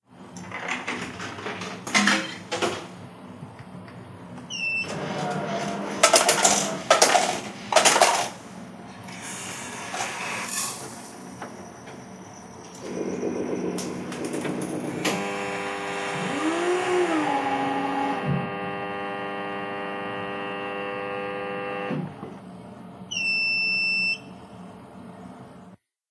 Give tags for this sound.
household machine

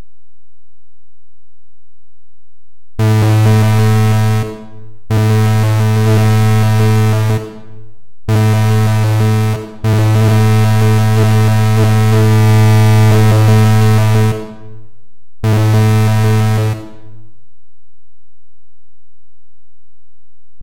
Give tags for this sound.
Weird Sci-Fi Machinery Synthetic Factory

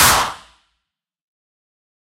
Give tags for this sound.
hardstyle clap

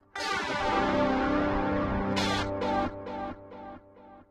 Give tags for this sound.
c e guitar-chords rythum-guitar guitar distortion

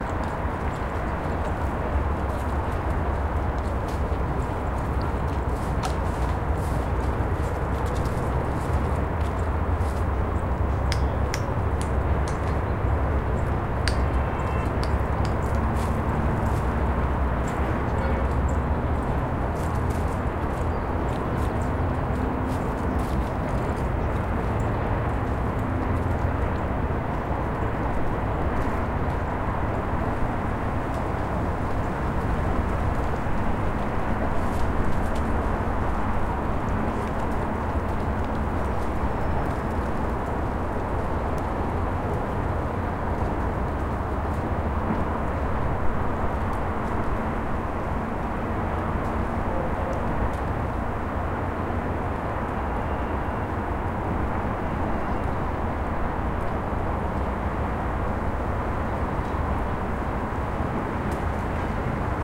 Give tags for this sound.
2012 area atmosphere Autumn background background-sound bridge cars city leaves noise Omsk people river rumble Russia seafront traffic trees wind

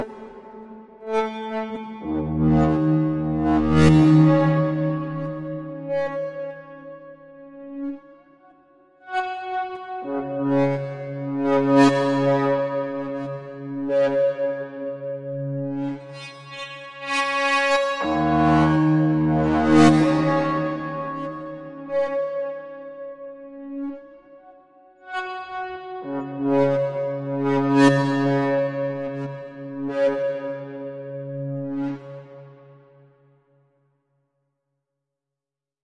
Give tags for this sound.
Ambient Bass Blues Acid Major Reverb Slow

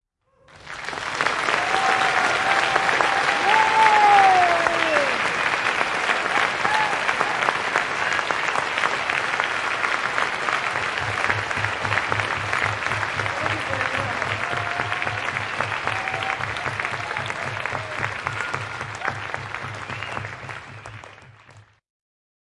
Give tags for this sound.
Aplodit Applaud Applauding Applause Audience Clap Club Clump Finland Finnish-Broadcasting-Company Klubi Soundfx Stamp Suomi Taputtaa Taputukset Tehosteet Yle Yleisradio

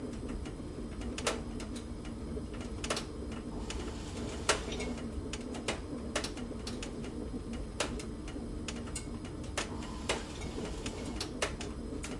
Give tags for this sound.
boil boiler boiling burning gas gaz heat heater heating hot interior loop zoomH5

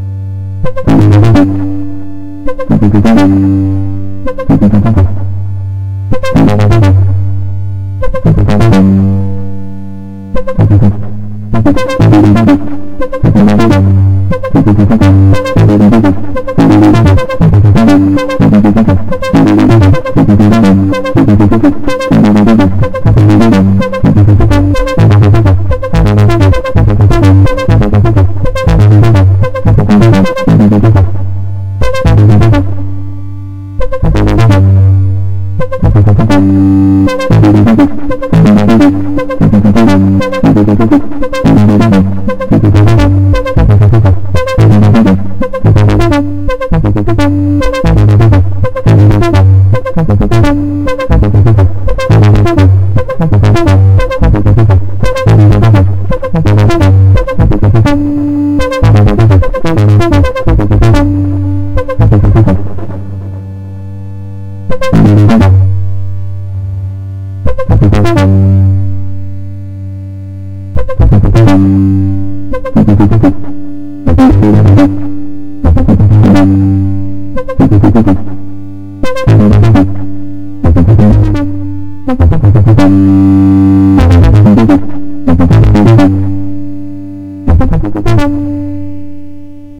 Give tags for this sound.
DIY Analog

